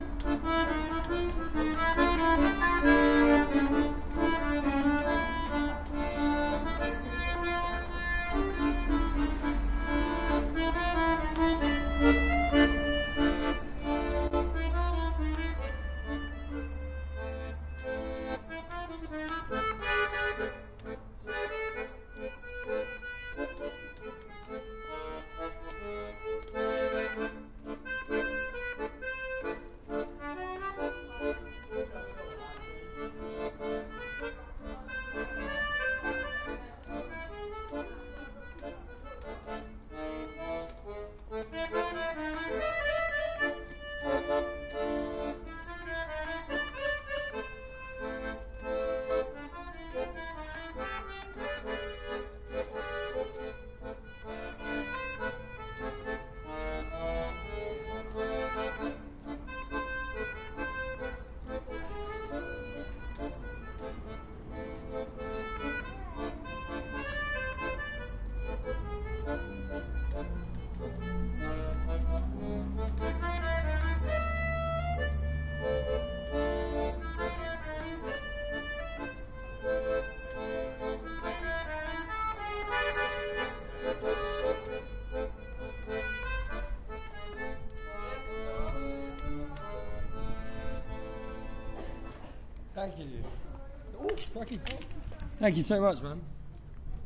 Accordion busker (Bristol)
A busker I captured in the Bristol Bear Pit playing the accordion. Recorded using a Tascam DR-05
130-bpm, accordeon, accordion, acoustic, atmospheric, back-ground, down-town, good, groovy, improvised, loops, music, musician, street-music, street-musician